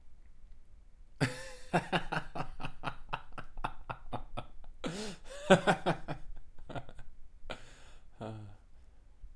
Laughter, casual (or fake)
Recording of a casual (or fake, depending on who you ask) laugh.
voice, male